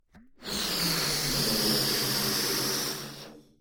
Balloon Inflate 4
Recorded as part of a collection of sounds created by manipulating a balloon.
Balloon, Soar, Machine, Inflate, Plane, Breath, Blow